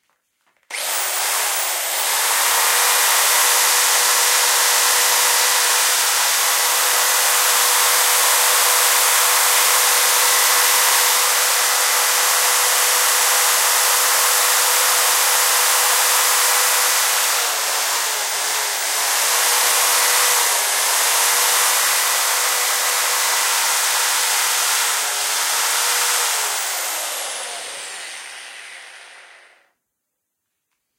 noise of an electric drill / ruido de un taladro